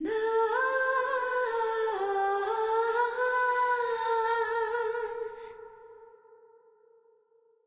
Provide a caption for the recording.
Airy female vocal, no lyrics, just "ahhh" like a dentist visit turned weird. The clip preview might have squeaks and sound crappy, but the download is high quality and squeak free.
Recorded using Ardour with the UA4FX interface and the the t.bone sct 2000 mic.
You are welcome to use them in any project (music, video, art, interpretive dance, etc.).
The original song was made using 4/4 time at 125BPM
125bpm, female-vocal